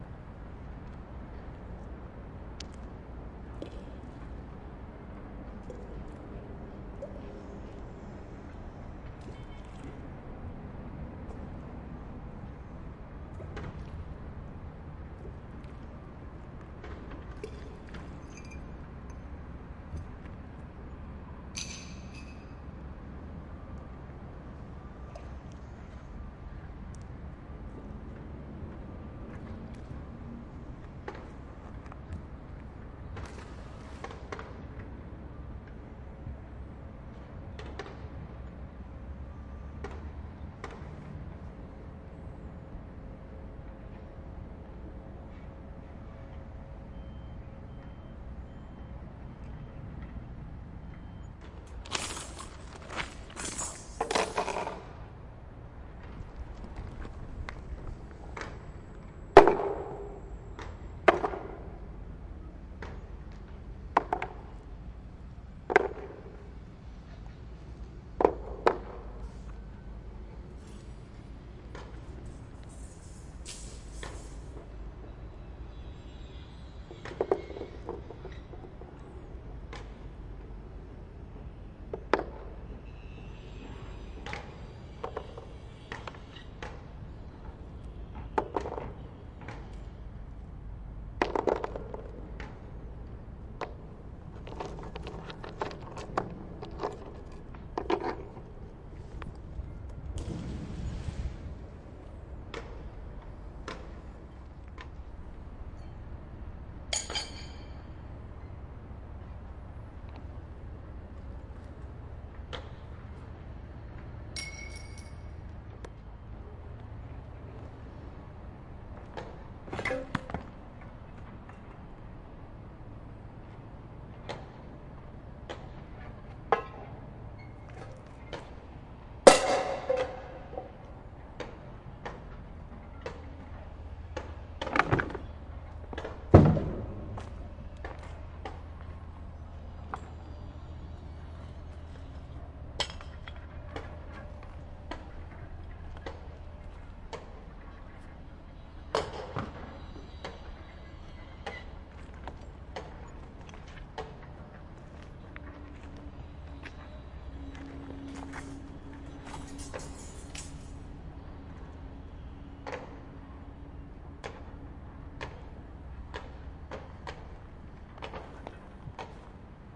Bassin Vauban jour pont objets
soundscape
large space outside.
Industrial
near the canal
workers far away
I make noises with various objects found under a bridge